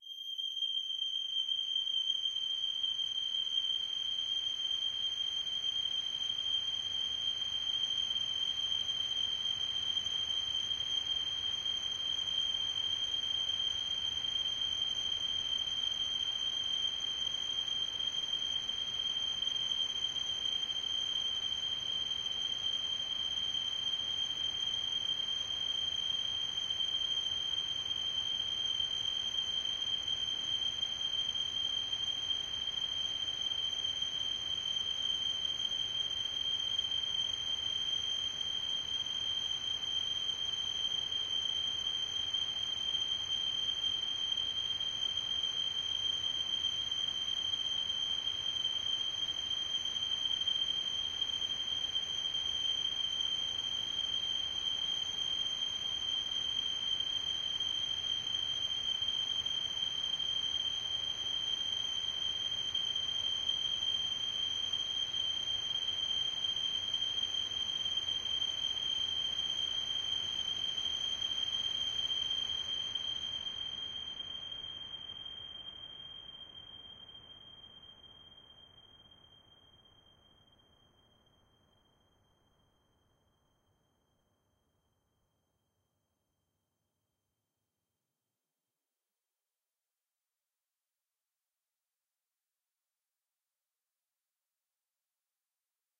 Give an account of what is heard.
LAYERS 015 - CHOROID PADDO- (115)
LAYERS 015 - CHOROID PADDO is an extensive multisample package containing 128 samples. The numbers are equivalent to chromatic key assignment covering a complete MIDI keyboard (128 keys). The sound of CHOROID PADDO is one of a beautiful PAD. Each sample is more than one minute long and is very useful as a nice PAD sound. All samples have a very long sustain phase so no looping is necessary in your favourite sampler. It was created layering various VST instruments: Ironhead-Bash, Sontarium, Vember Audio's Surge, Waldorf A1 plus some convolution (Voxengo's Pristine Space is my favourite).
ambient, drone, multisample, pad